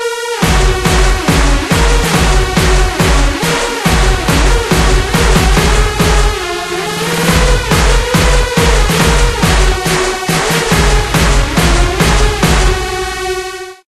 I was bored, and so I've created this Sound.I used a few Buzz-Generator sound along with some Porta effects to crate a Voice-Like Sound.Added some Drums which are Pitched down a little.